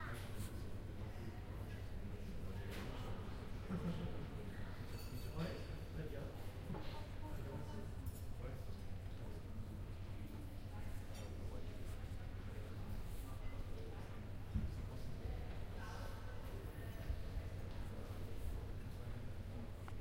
P1b ZKM cafeteria calm 18
ZKM Karlsruhe Indoor Bistro
ZKM, afternoon, cafeteria, eating, indoor, people, restaurant, reverberant